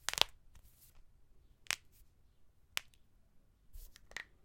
Clicks of fingers joints.